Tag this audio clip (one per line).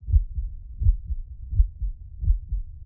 stethoscope
human
panic